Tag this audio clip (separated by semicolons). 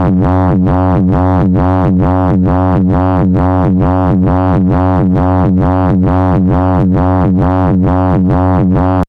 soundeffect; noise; experimental; sci-fi; drone